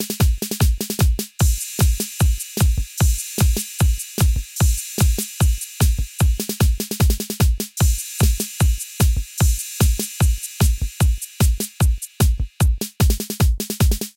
808 Surf drum beat /w cymbals
Surf drum w/cymbals like that used in 1960's surf music using 808 sound. Clean on effects. Cowabunga Dudes!
surf, rock, drum, beat